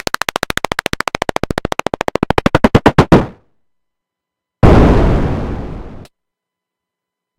Sub 37 Run and Explode
By far, the best homegrown weird sound I can say I'm proud of. Like an Atari blowing up.
Abstract, Experimental, Loop, Percussion